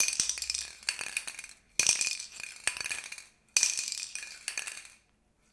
Queneau Bombe Peinture38
prise de son fait au couple ORTF de bombe de peinture, bille qui tourne